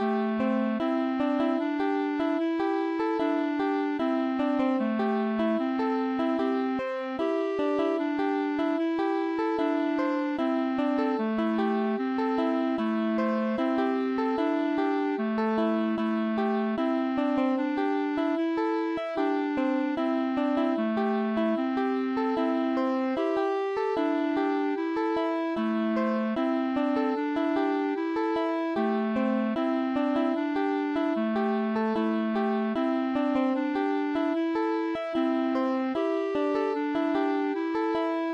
Pixel Piano Adventure Melody Loop Version 2
version 2, slighty different, but same ritme. Useful for 2d pixel game adventures or shops in city areas
Thank you for the effort.